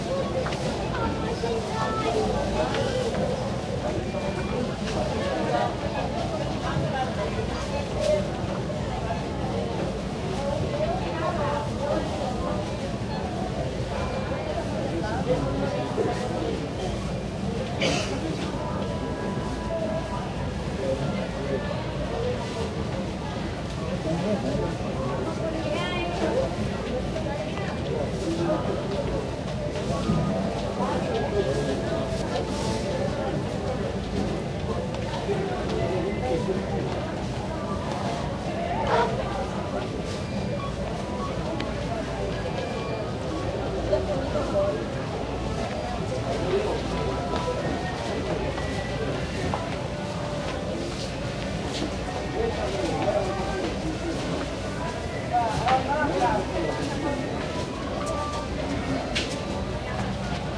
supermercado (mono)
supermarket(mono audio)
field-recording, supermarket, ambience, mono